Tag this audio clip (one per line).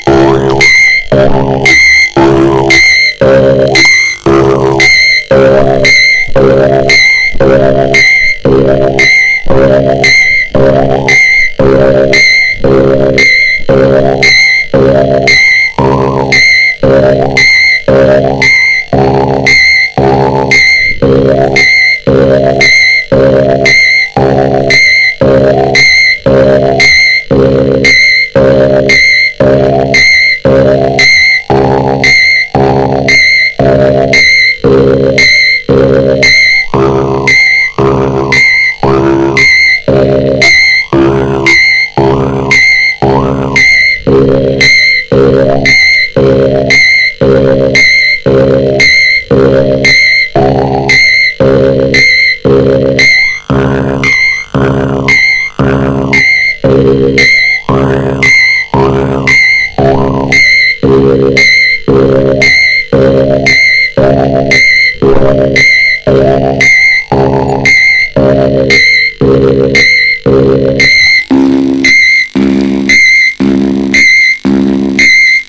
chippewah
North-America
indians